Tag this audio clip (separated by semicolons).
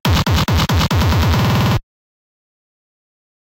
e; fuzzy; deathcore; processed; l; t; glitchbreak; pink; k; o; y; love; h